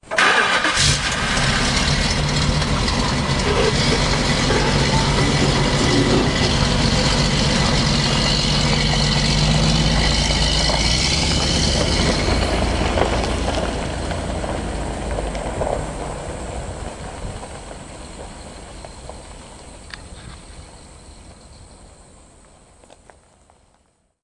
MHLOW StartQuickDepart
Motor-home starts and quickly departs.
Transportation, Bus, Motor, Home, Travel, Drive, Transport, Depart, Passing, Ride